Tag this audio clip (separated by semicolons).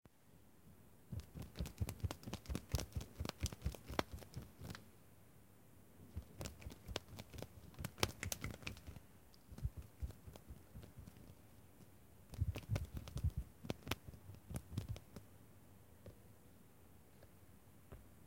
perro; Sacudida; sonido